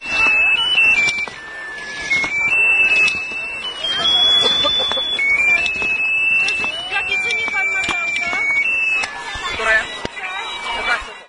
sw.mikolajek
11.11.09: between 14.00 and 15.00. during the ceremonial annual parade on the street Św/Saint Marcin day name; recording of the sound producing by the doll on the street fair
no processing
parade, poland, poznan, street-fair, toy